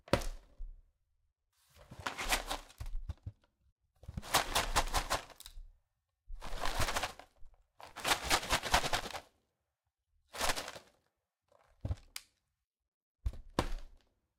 cornflakes package rustle shake fall
flakes package, shaking, rustle, fall
cornflakes, fall, flakes, food, package, shake